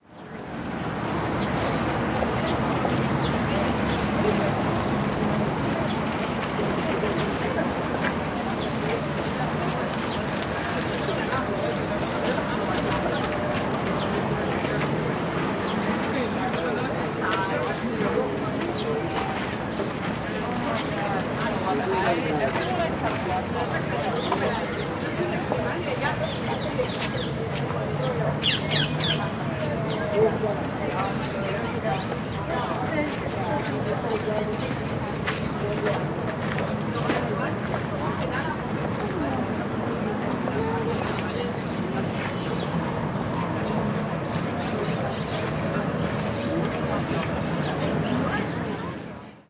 Set of recordings made for the postproduction of "Picnic", upcoming short movie by young argentinian film maker Vanvelvet.
ambience, barcelona, ciutadella-park, exterior, mono, urban, walla